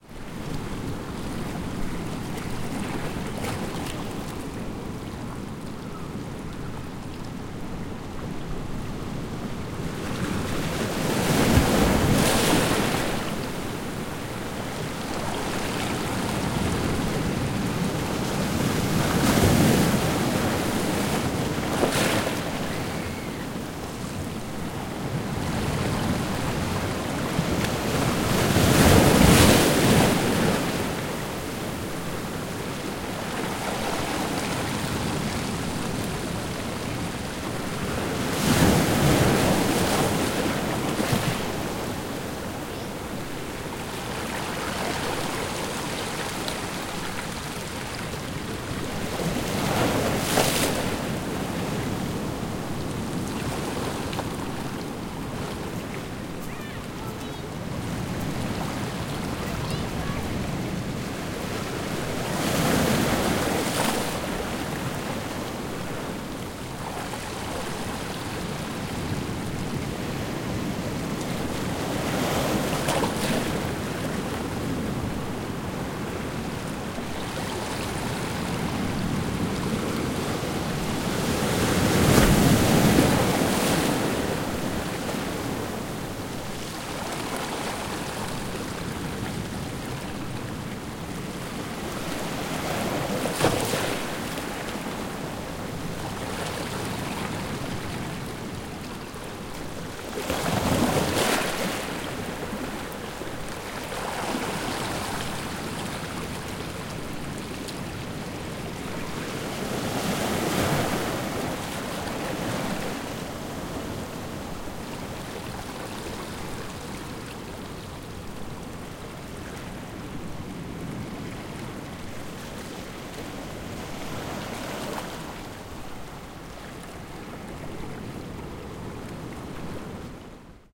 Close up recording of waves rolling into rocky beach. I was moving with the water a little as it rolled in and out to get detailed sounds of water, pebbles, etc. Recorded at Montana de Oro state park in California, USA. Very quick fade in and out, otherwise raw recording, no processing of any kind.